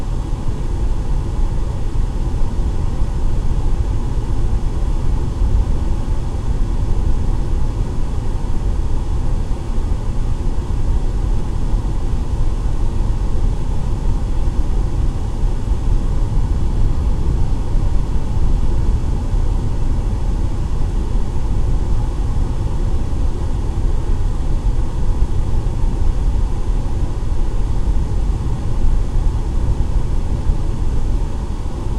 AC Unit
ZOOM H4 recording of an air conditioning vent in my room.